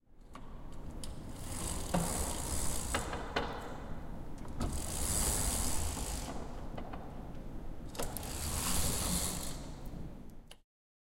STE-018 Curtain Up Down
Move the curtain of a classroom up and down.